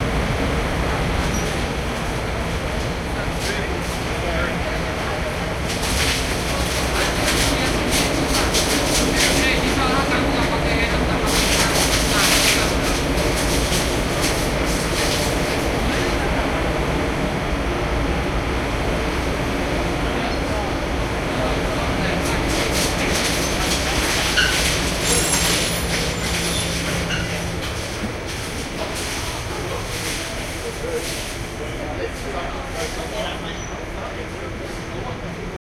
Cruiseship - inside, waste press (engine sound, loud machinery, philippinos talking). No background music, no distinguishable voices. Recorded with artificial head microphones using a SLR camera.